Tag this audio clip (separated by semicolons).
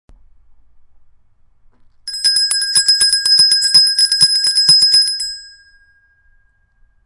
Bell; ring; ringing